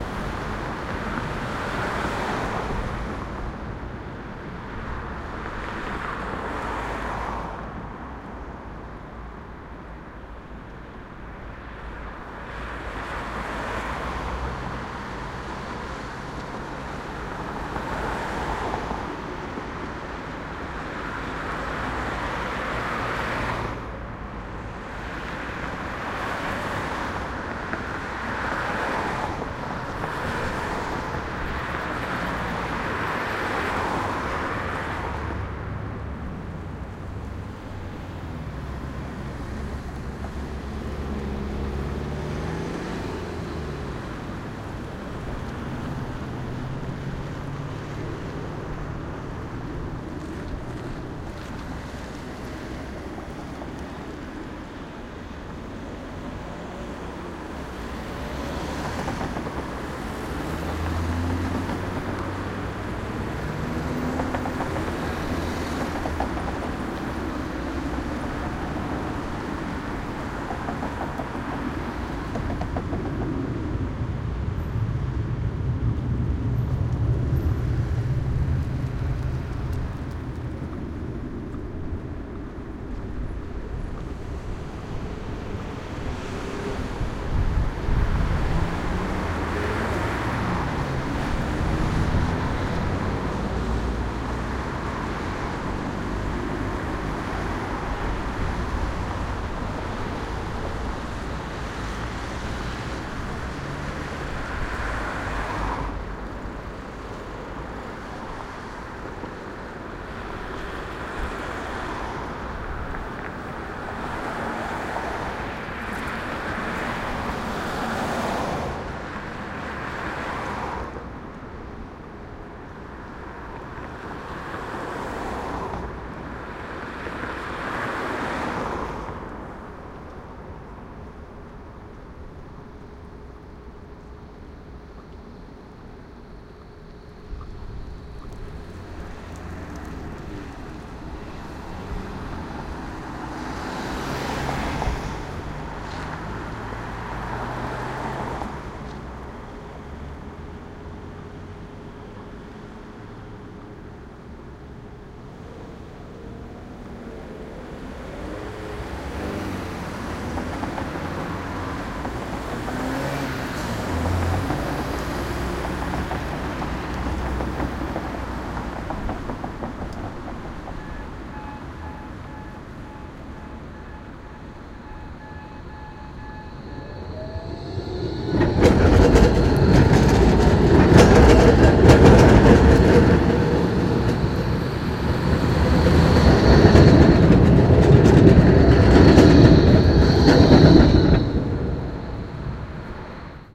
Berlin Urban Sounds - Tram and Cars
Standing at S Bhf Greifswalder Str while waiting for the Tram.
Recorded with a Zoom H2.